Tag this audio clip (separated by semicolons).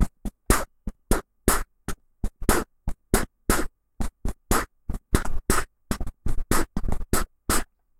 noise-gate; clap; Dare-19; crispy; 120-bpm; claps; loop; rhythm; beatbox